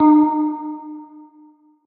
Voice'ish sound. Unison detuned sine waves.
chorus, oohh, voice